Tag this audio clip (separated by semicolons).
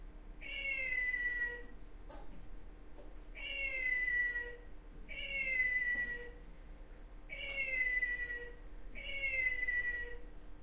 Primary; School; cat; UK; Galliard